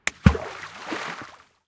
WATRSplsh rock splash 20 TK SASSMKH8020
Throwing various sized rocks into a large lake. Microphones: Sennheiser MKH 8020 in SASS
Recorder: Zaxcom Maxx